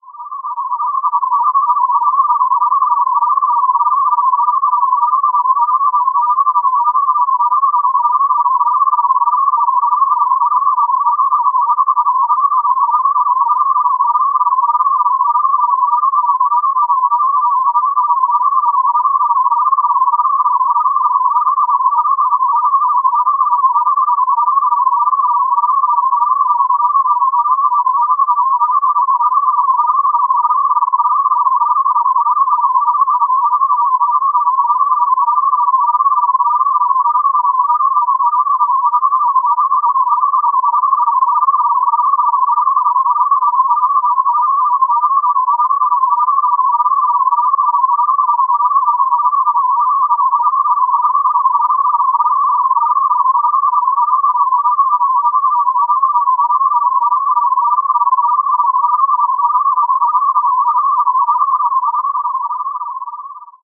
Here is another weird sound produced by my BeeFree software.